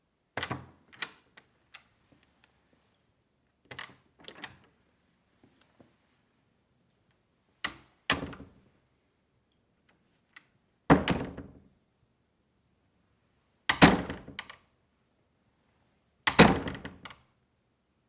Cheap hollow wooden bathroom door, open and close
Hollow wooden door opening and closing
closing, opening